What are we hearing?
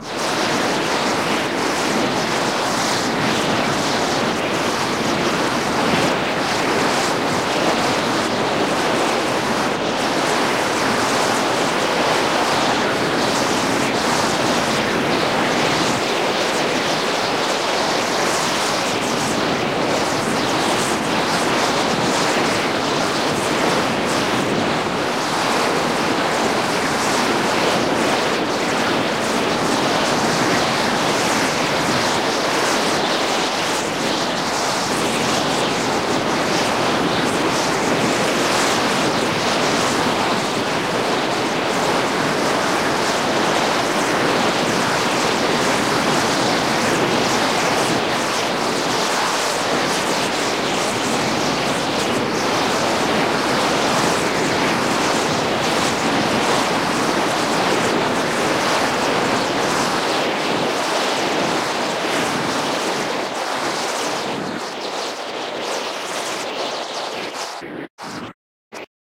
constructed from fragments of field recording, Oslo Norway. Processed with granular techniques, and placed in stereo by pitch shifting differing sections and panning around the listener. Some use of phase techniques in an attempt at interesting cancellation effects, headphones or loud playing might give some 360 degree feeling.. maybe?
air, processed, rushing, wind